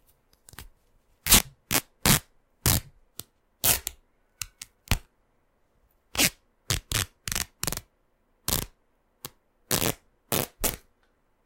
Fabric ripping and tearing